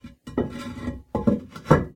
Toilet-Tank Lid Move-05
This is the sound of the lid of a toilet tank being dragged along the tank.
ceramic, drag, grind, grinding, scrape, scraping, toilet